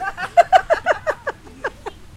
people laughing outdoors 001
women laugh outdoors
jolly, outdoors, laughter, voice, funny, women, laugh, laughing, people, female, field-recording, woman, giggle